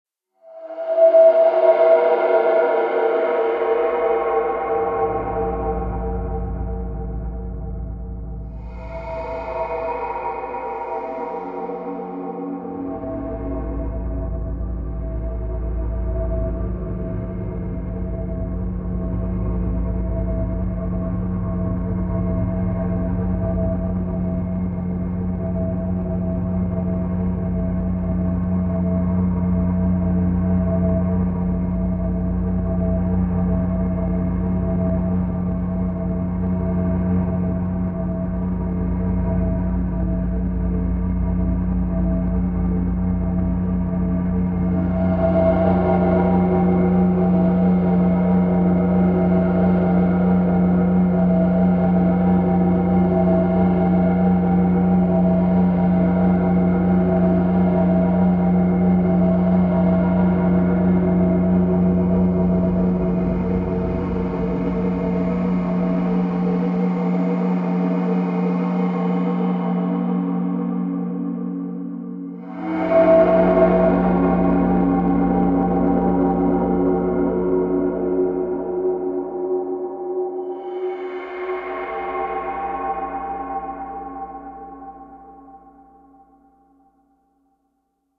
Too much room - 2013
Created with Cubase 6.5.
I processed drumhits with some reverb, lots of reverb.
It's always nice to hear what projects you use these pieces for.
You can also check out my pond5 profile. Perhaps you find something you like there.
80s, ambient, atmosphere, cinematic, creepy, dark, DAW, distrubing, drone, film, horror, mood, movie, mysterious, processed, scary, sci-fi, sinister, soundscape, space, spooky, suspense, tension, uneasy